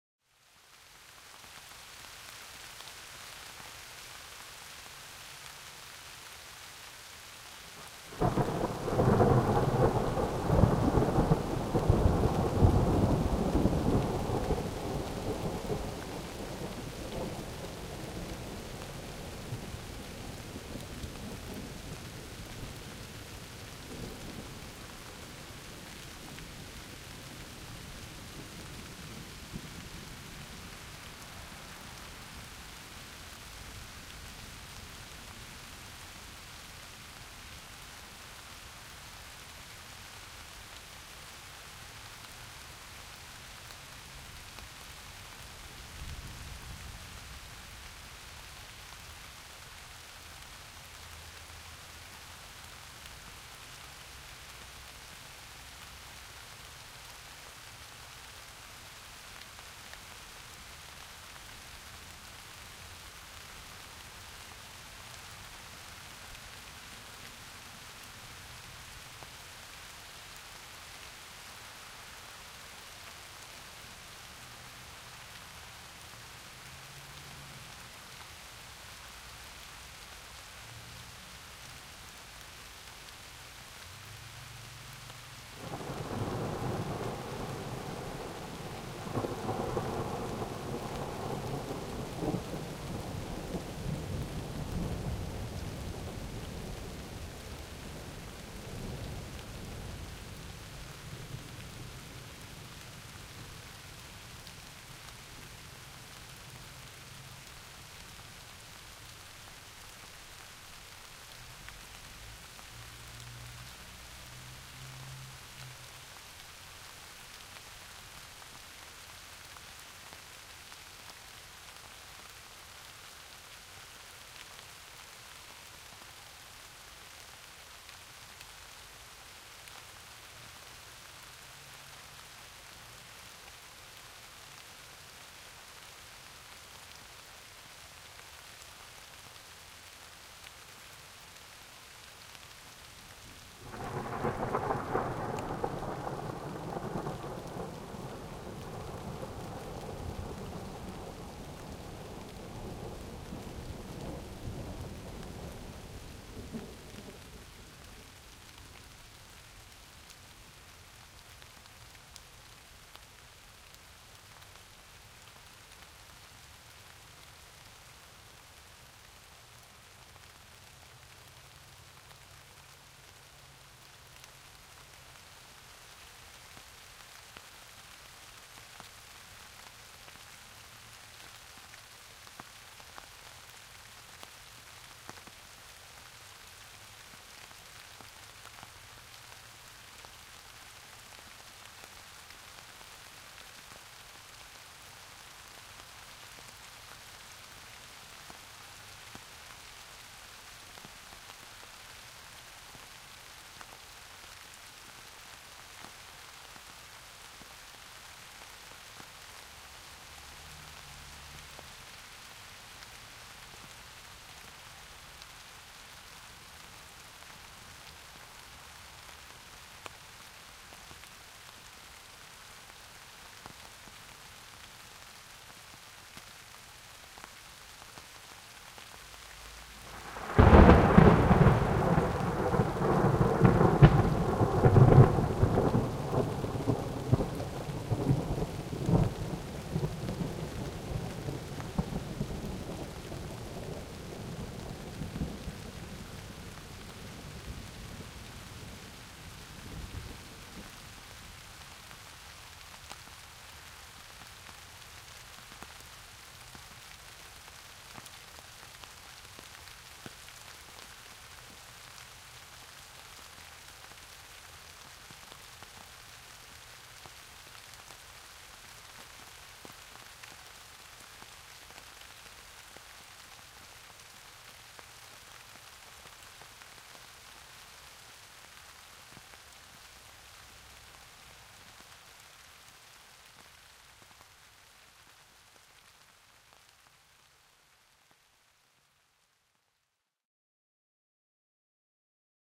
suburban rain
A thunder storm rolls through a suburban neighborhood.